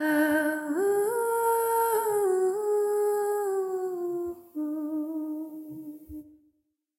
Female 'Ooh' Singing Voice (Cleaned with reverb by Erokia)
A female voice singing just a generic kind of'ooh':). Cleaned with reverb by Erokia!